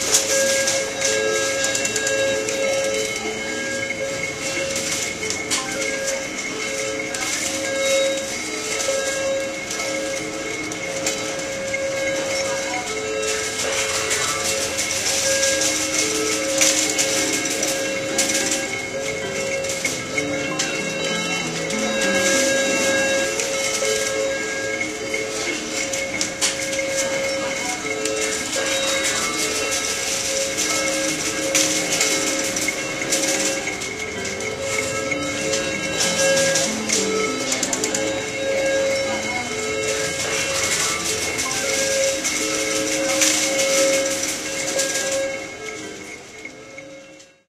Casino Noise
Various noises recorded in casino's mixed together.
Casino-background, slot-machine